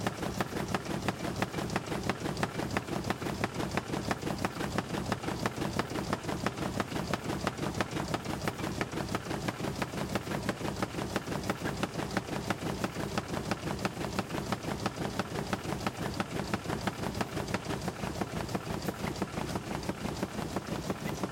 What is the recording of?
cardboard factory machine-002
some noisy mechanical recordings made in a carboard factory. NTG3 into a SoundDevices 332 to a microtrack2.
engine, factory, industrial, loop, machine, machinery, mechanical, motor, robot